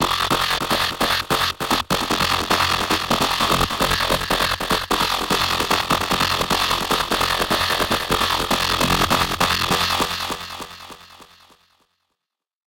synth riff done in fl studio on the wasp xt. of course multiple effects tuned.
150 bpm the key is not exactly relevant cuz its more of an effect fm riff.